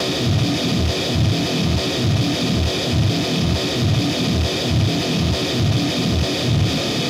135 Grunge low gut 02
bit, blazin, crushed, distort, gritar, guitar, synth, variety